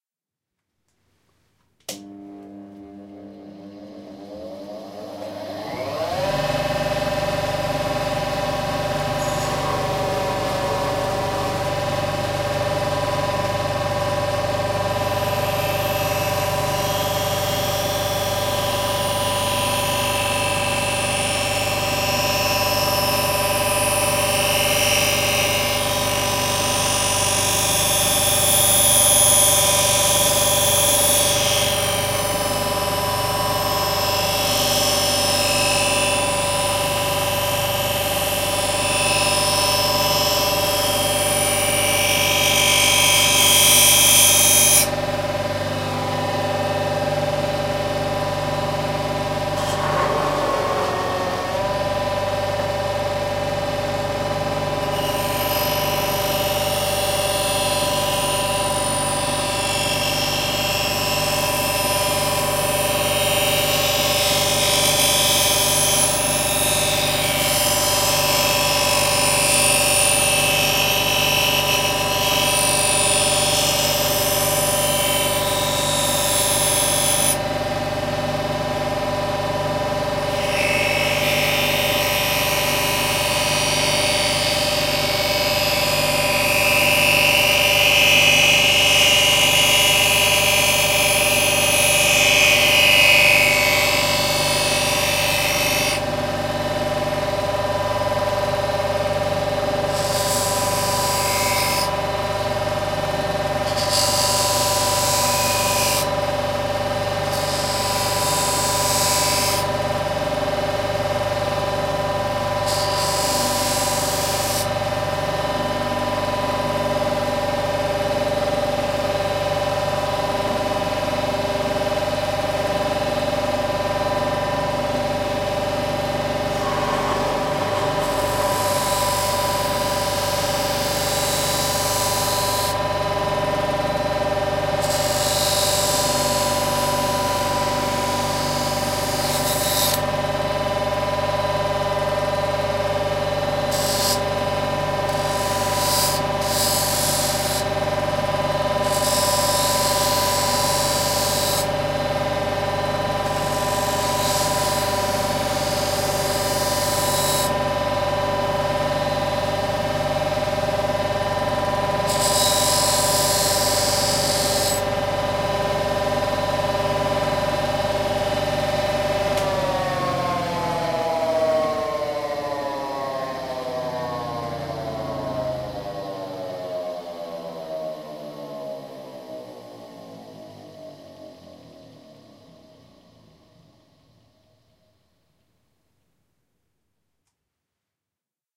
Honing Pocketknife
A stereo recording of honing a very blunt stainless steel knife on a modified bench grinder with a honing wheel at one end and a cloth buffer/polisher at the other.The buffing wheel is slightly off balance (as is their nature) and is audible as such.
honing, machine, motor, machinery, polish, polishing, buffer, sharpening, stereo, buffing, blade, knife, xy